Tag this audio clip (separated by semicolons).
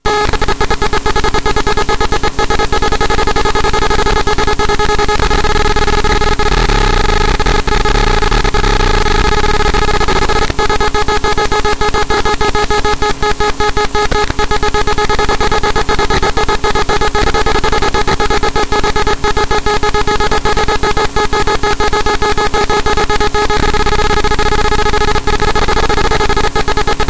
Akai-MPC-1000; BFD-Eco; disturbances; external; FXpansion; harddrive; magnetic; noise; off; pulse; write